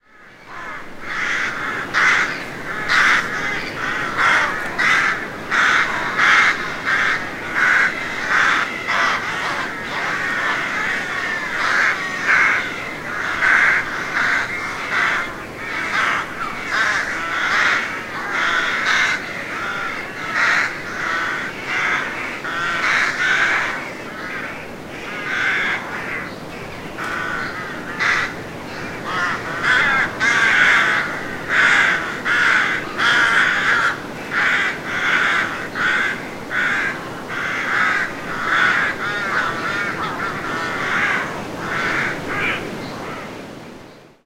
Nesting Rooks
Rooks nesting in tall trees in a wood in Gloucestershire, UK. Windy day.
Birds, Noise, Rooks, Wind, Woods, nesting